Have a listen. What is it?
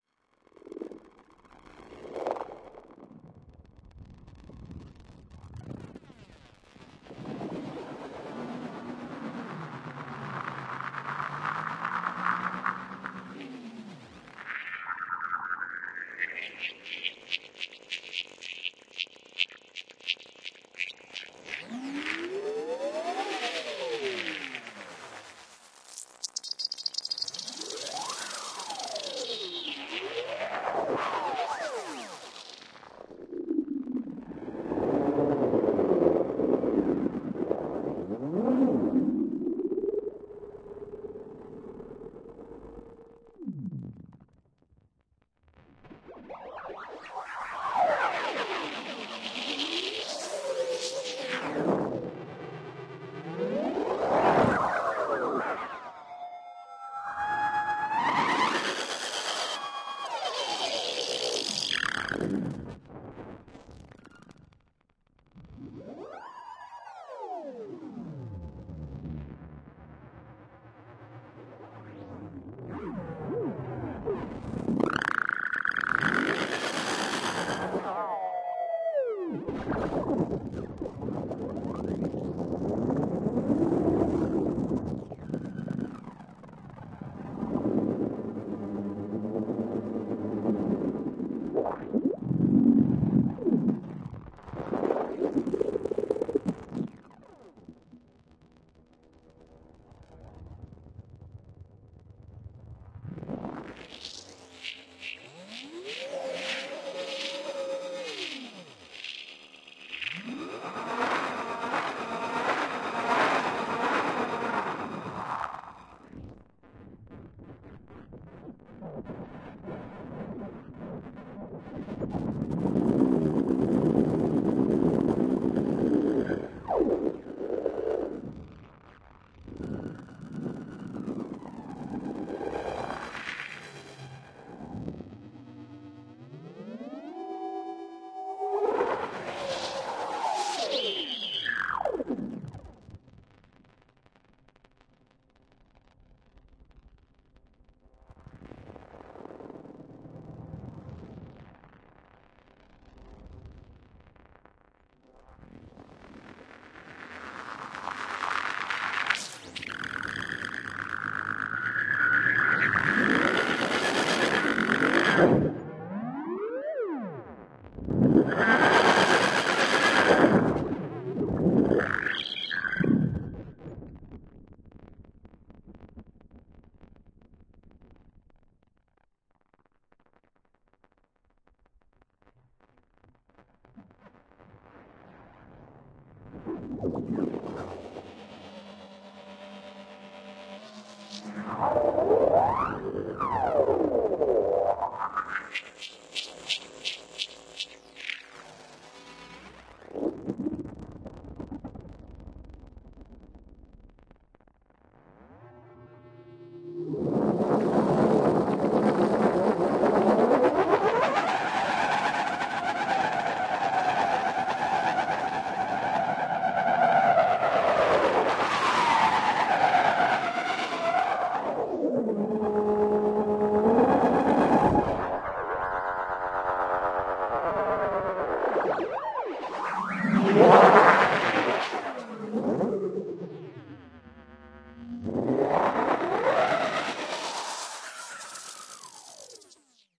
ESERBEZE Granular scape 33
16.This sample is part of the "ESERBEZE Granular scape pack 3" sample pack. 4 minutes of weird granular space ambiance. Spacey weirdness soundscapes.
reaktor, drone, effect, space